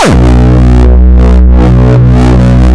gabba long 005
distortion, gabba, kick